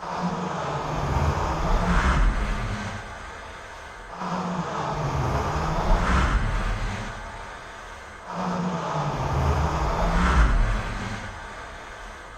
this is a looping sound effect. timing got screwy while making it so i dont have a bpm or even a time sig. made it with an old yamaha synth and a mac. makes me kind of nervlous when i lissen to it so it might work good whe you wanna confuze somebody or make them cautious.
sfx, fat, soundeffect, weird, tense